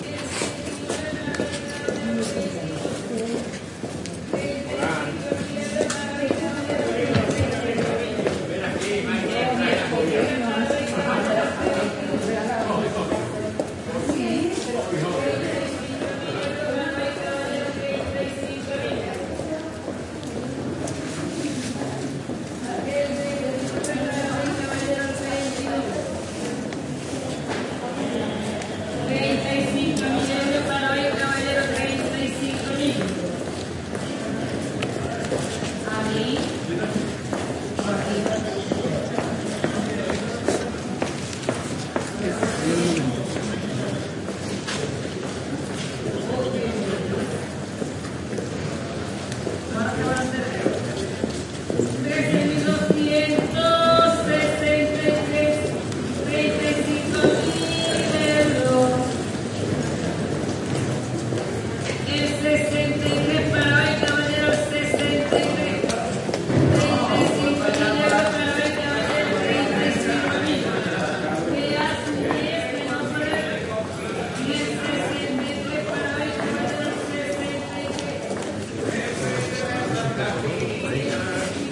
20091217.07.street.ambiance
early morning ambiance in pedestrian-only street. Voices, heel tapping, lottery hawking. Recorded in Seville (Sierpes St) during the filming of the documentary 'El caracol y el laberinto' (The Snail and the labyrinth), directed by Wilson Osorio for Minimal Films. Shure WL183 capsules, Fel preamp, Olympus LS10 recorder.
ambiance, city, field-recording, seville, spanish, voice